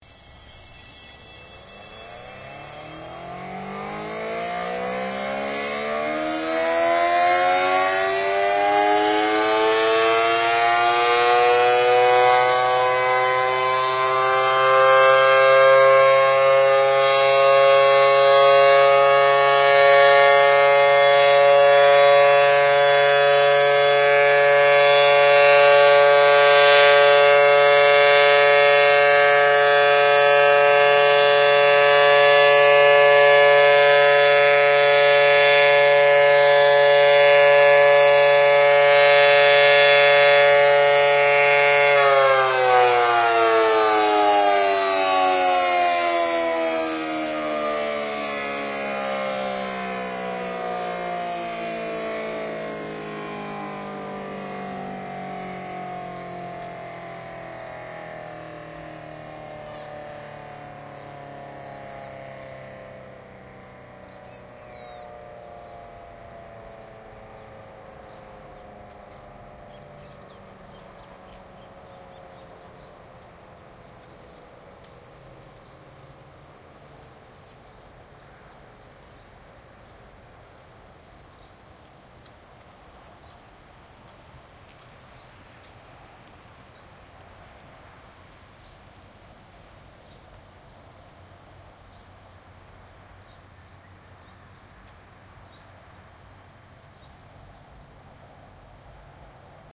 6-1-09 Kalihi Model 5T
6-02-09. Monthly statewide siren test. Federal Signal 5T 3-phase dual tone siren. Located at the entrance to Kalihi elementary school.
5t, binaural, civil, defense, disaster, emergency, hawaii, honolulu, hurricane, outdoor, raid, siren, test, tornado, tsunami, warning